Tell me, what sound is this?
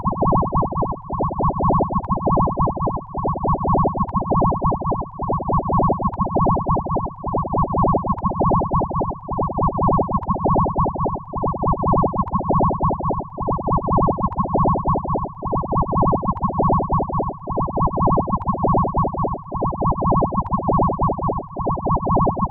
A flying object, the origins of which have not been identified.
Created in Audacity.
alien, aliens, fiction, paranormal, science, sci-fi, space, spacecraft, UFO